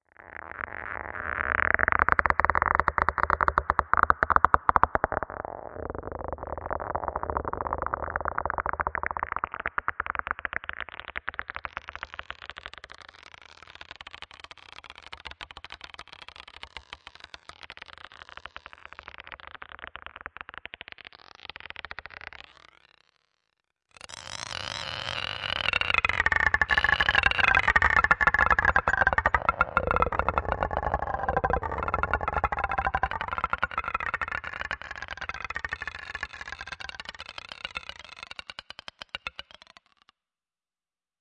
A physical modelled marble of stone or glass bouncing and rolling in stereo space. It is a rendering of a patch from LogicPro's physical modelling synth.
bounce, bouncing, dance, dancing, glass, marble, roll, rolling, space, stone